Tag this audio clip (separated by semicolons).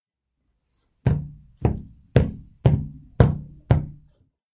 boots; footstep